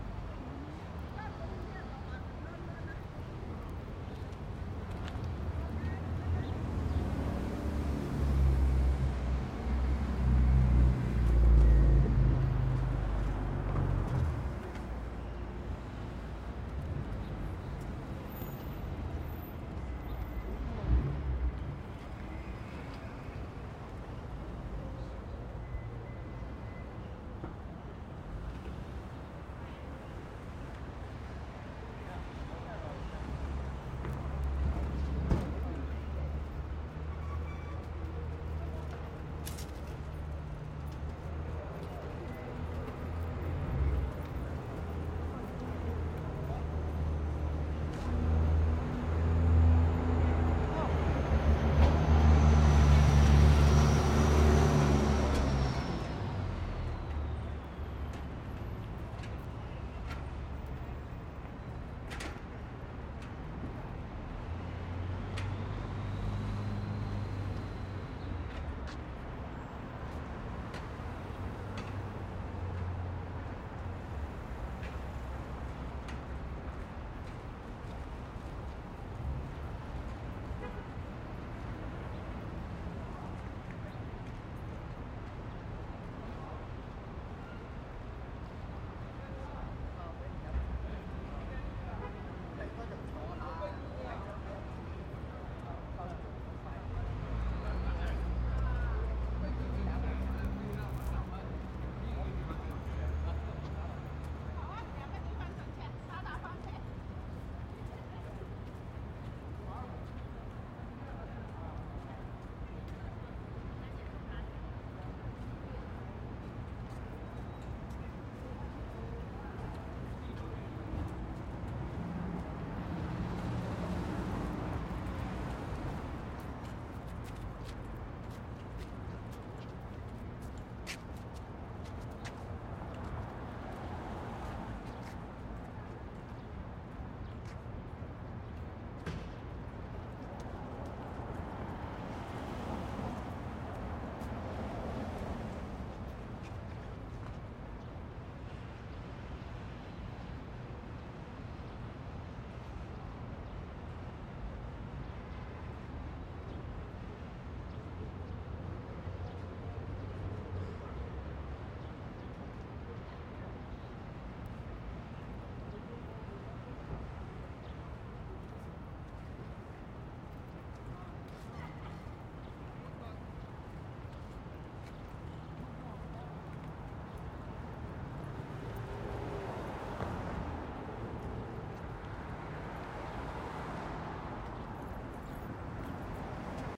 Chinatown Sidewalk
people talking in the streets in Chinatown ambience